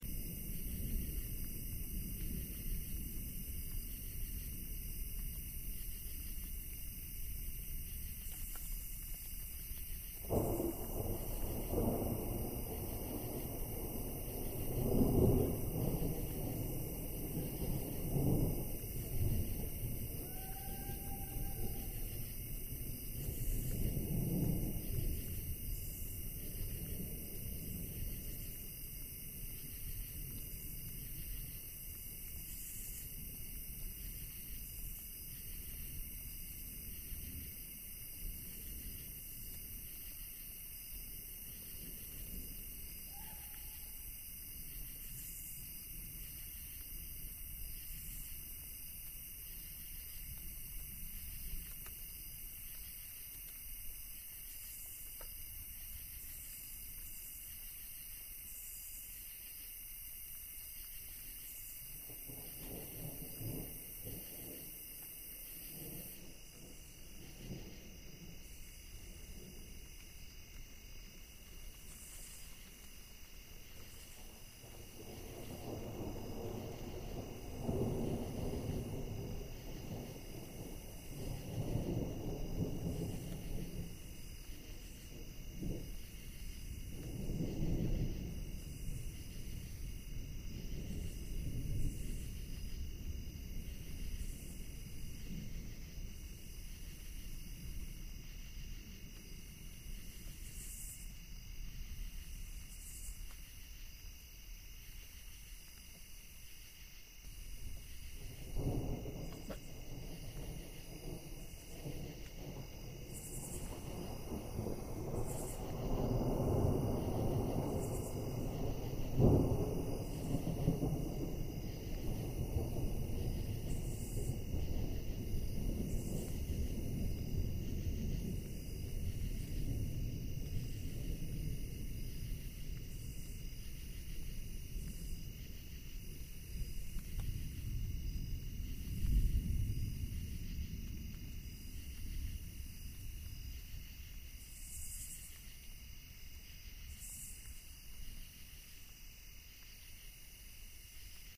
Stereo recording of distant thunder in the country.